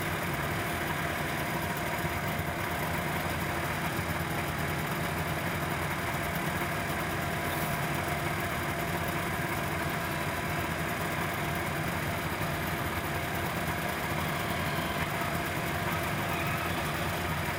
snowmobile idle nearby crispy